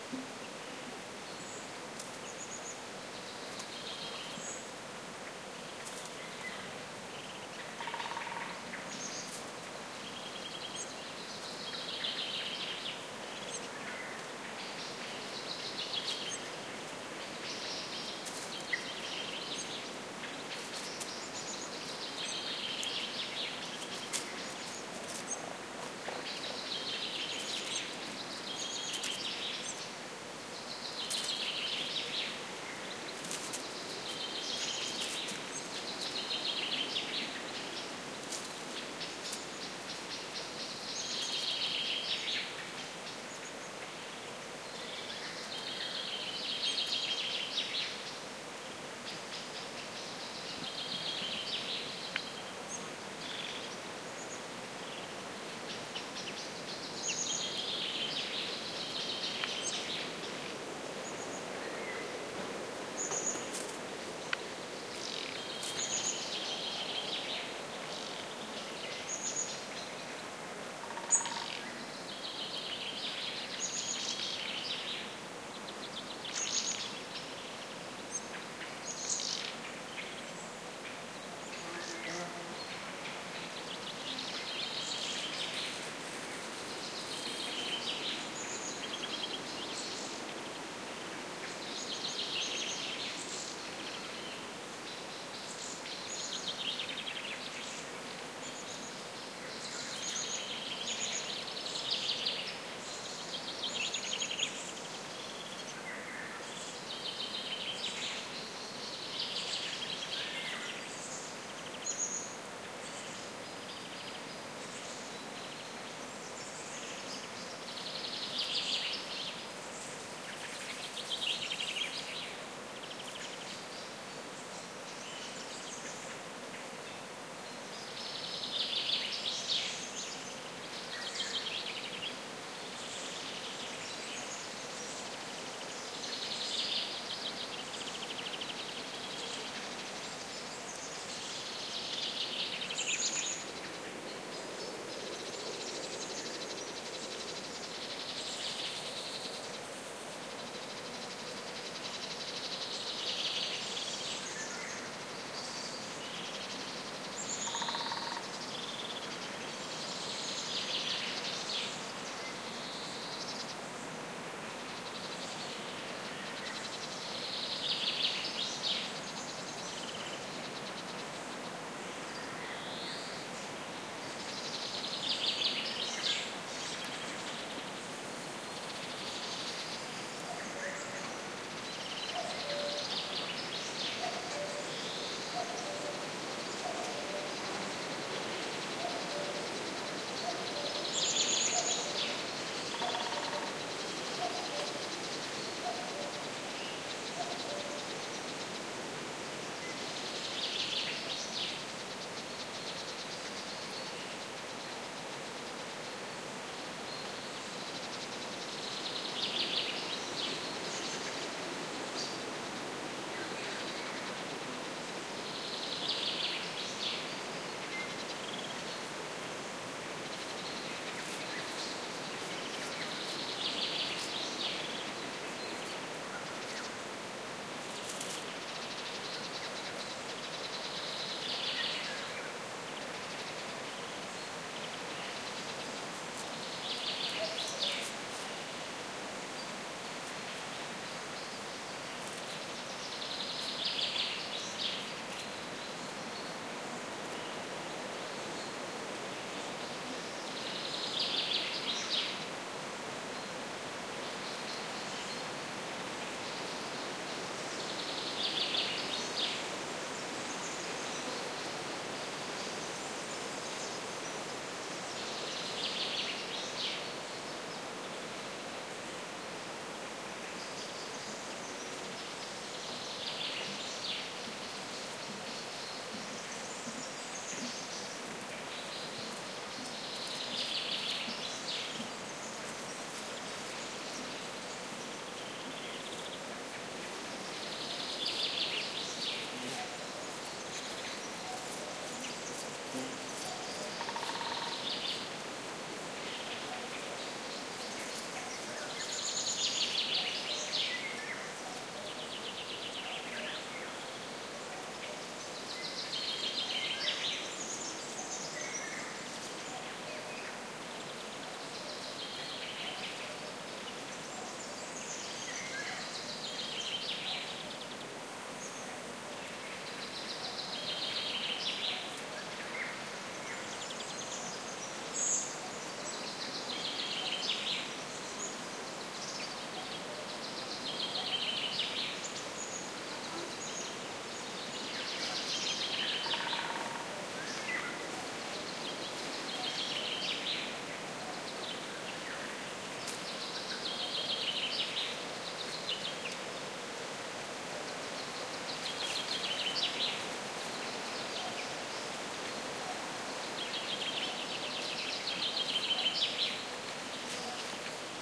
20120609 forest spring 01
Spring ambiance in Mediterranean forest, with wind noise and many birds calling (warblers, oriole, cuckoo). Recorded at the Ribetehilos site, Doñana National Park (Andalucia, S Spain)
warbler, Mediterranean, spring, wind, forest, oriolus, spain, woodpecker, field-recording, Donana, golden-oriole, cuckoo